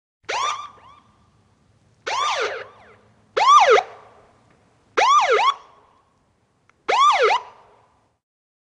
Police Siren Yelp
car, cop, federal, police, pursuit, road, sheriff, siren, SWAT, wee-woo, woop